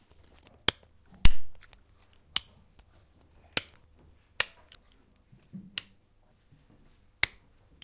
mySounds EBG Gonçalo2
Sounds from objects that are beloved to the participant pupils at the Escola Basica of Gualtar, Portugal. The source of the sounds has to be guessed.
mySounds, Escola-Basica-Gualtar